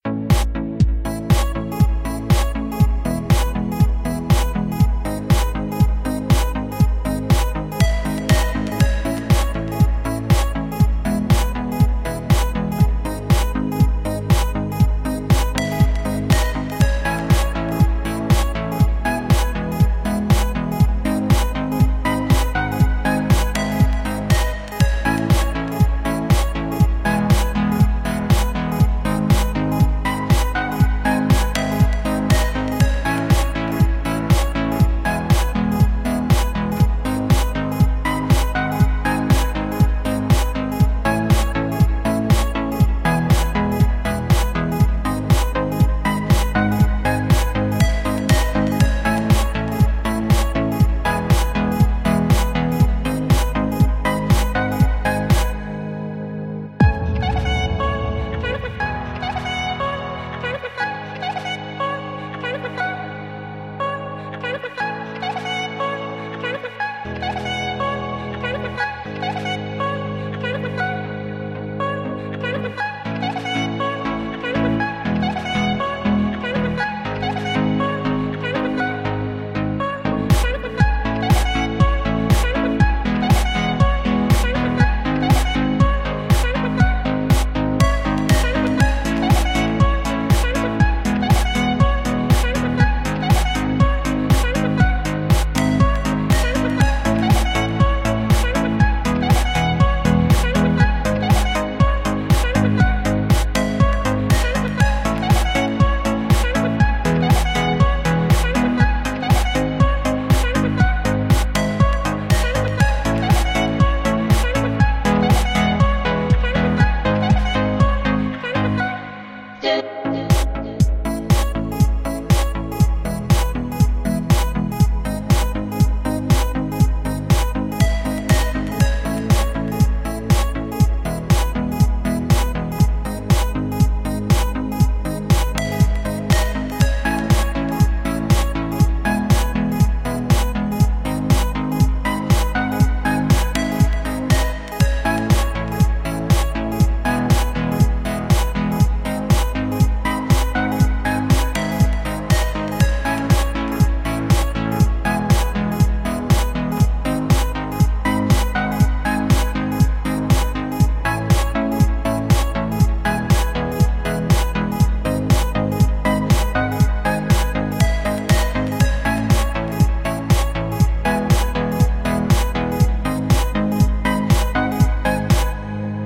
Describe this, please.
Progressive element - electronic track
Proressive element - electronic track,
DAW:Ableton live,Kontakt,silenth1.
club, synth, rave, music, house, element, techno, electronic, Proressive, sound, beat, bounce, trance